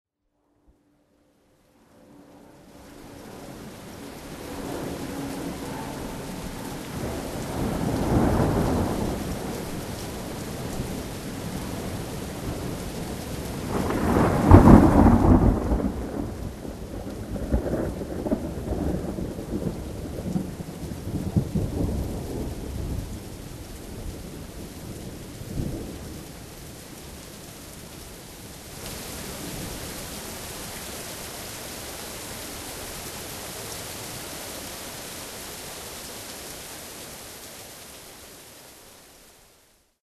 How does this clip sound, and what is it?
TEPC THUNDERCLAP RAIN v1 14082014
Thunder clap during a heavy rain storm in London. Recorded on a Zoom H1 at 9600bps 48Mhz